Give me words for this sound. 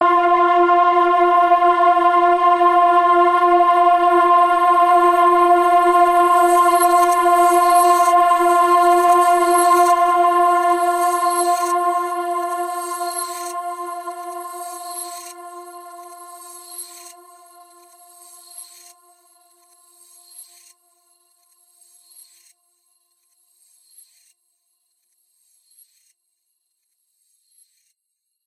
A very dark and brooding multi-sampled synth pad. Evolving and spacey. Each file is named with the root note you should use in a sampler.
ambient, dark, granular, multi-sample, multisample, synth